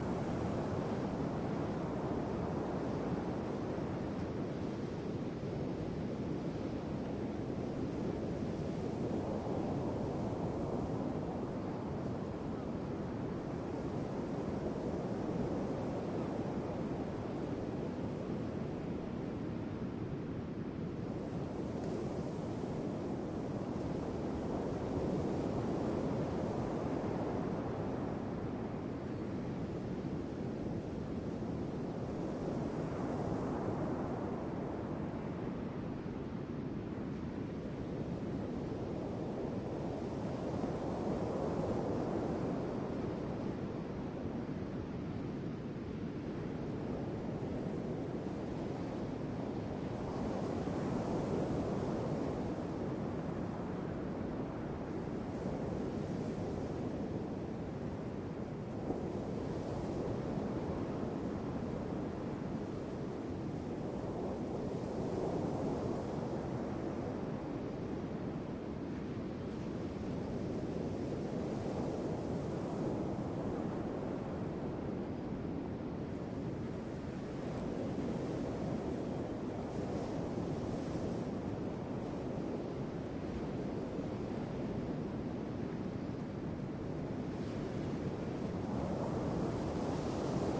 west coast waves at Henne beach 2011-12-28
A short recording af some west coast waves in henne. Recorded with a Zoom H2.
beach, denmark, dk, henne, jutland, water, wave, waves, west-coast, wind, Zoom-H2